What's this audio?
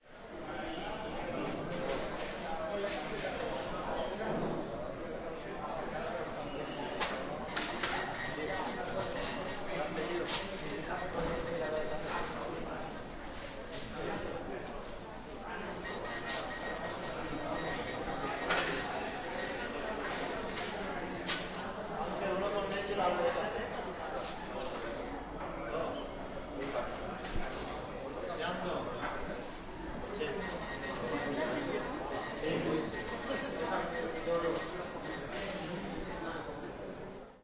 Ambient Cafeteria
So ambient de la cafeteria del Campus de Gandia
ambient, universitat, cash, upv, cafeteria, university, campusgandiaupv, coffe, campus, gandia